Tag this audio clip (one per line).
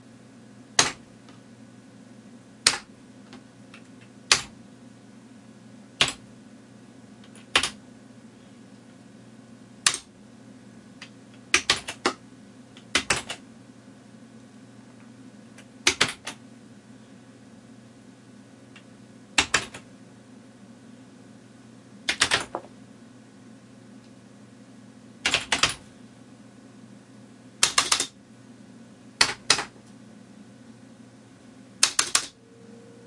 computer
keyboard
keystroke